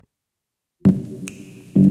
Vinyl Loop 4
sounds of an ending vinyl record
lofi, gramophone, surface, retro, 78, analog, album, noise, record, vinyl, vintage, LP, surface-noise, dust, scratch, hiss, scratched